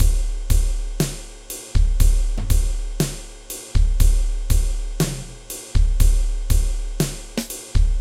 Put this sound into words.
Stone Beat (120)
A common 120 bpm beat with a slow stoner feeling, but also a downtempo trip-hope texture.
svayam
hydrogen, rhythm